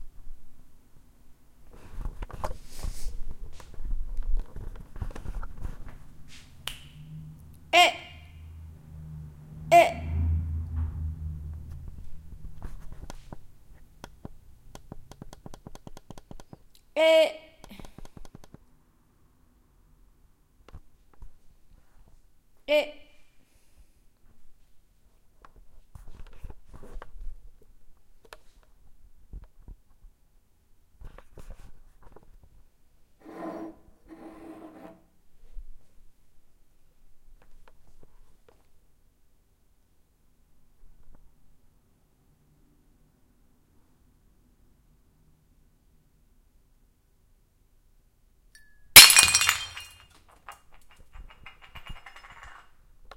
cup-falls-1
A cup falls from the waist down and breaks into the floor, recorded in my classroom with a Zoom.
breaks, ceramic, cup, floor, solid